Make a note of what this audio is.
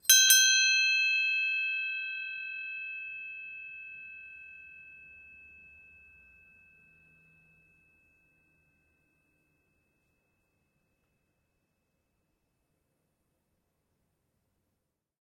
Twice beats of a bell.
See also in the package
Mic: Blue Yeti Pro
ship-bell, ring, bell